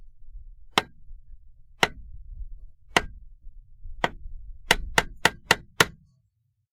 Hammer sound4
OWI hammer hammering workshop strike
hammering, strike, hammer, workshop, OWI